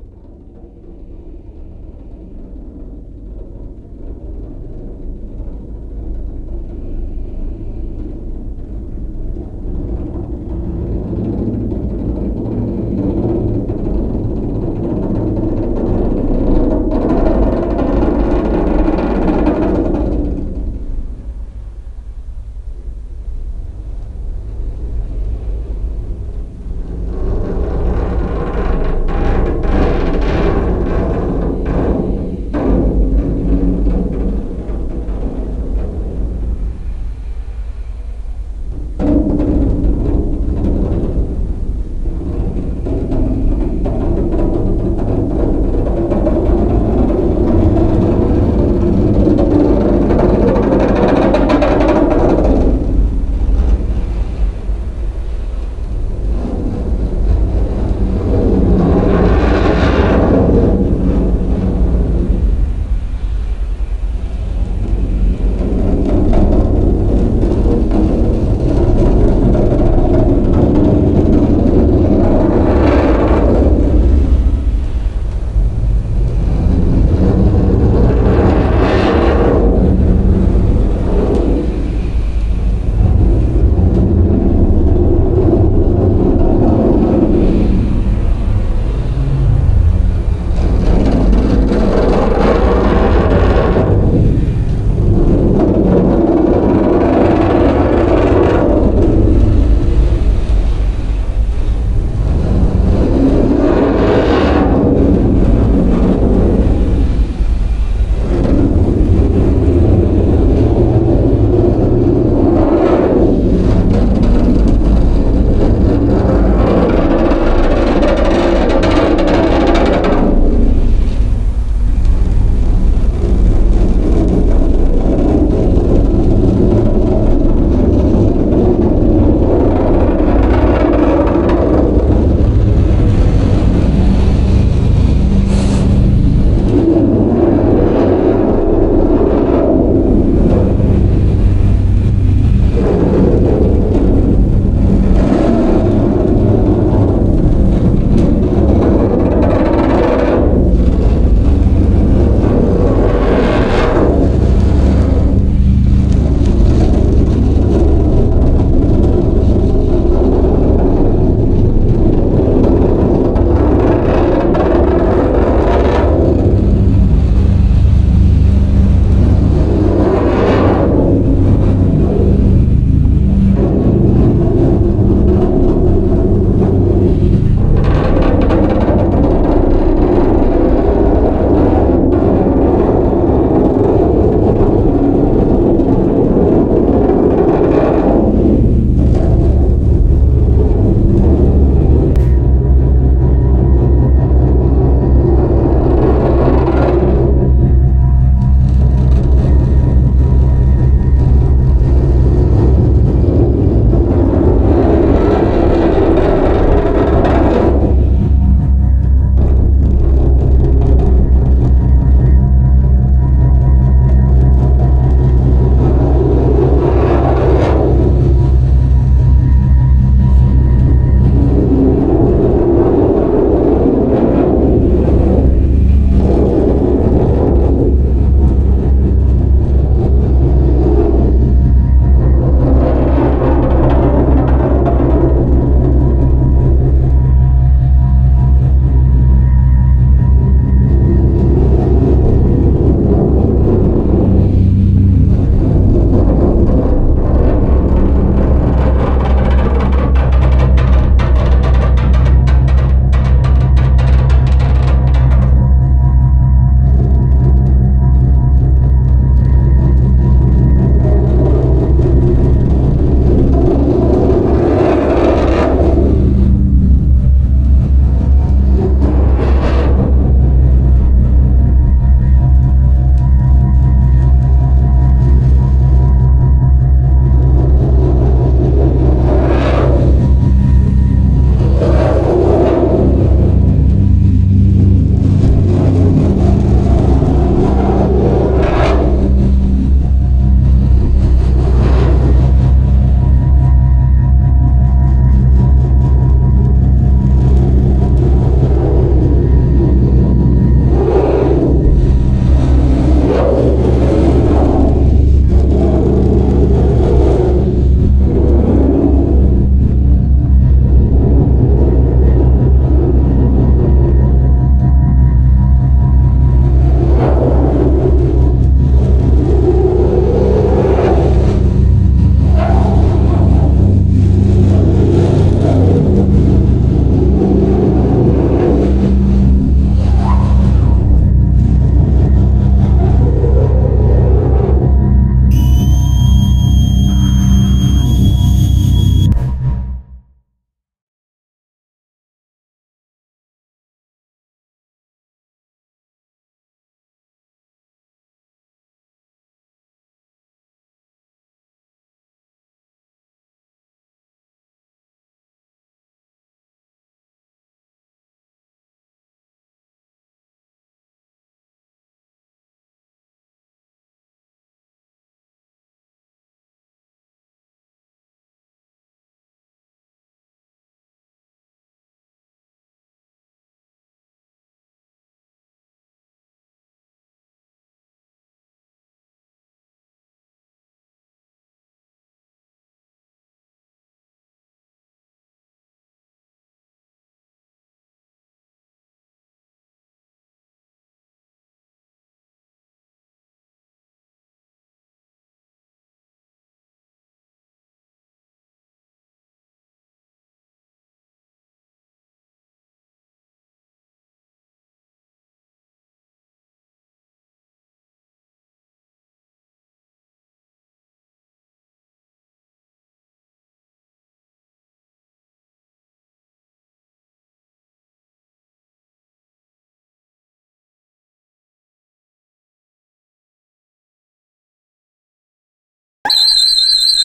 The sound of sand on Dune, enormous manufacturing plants with capacity for shipping the drug named ,,,forgotten..., The machines are working day and night and are hundreds of years old, once designed never to stop.
cinematic, sci-fi, space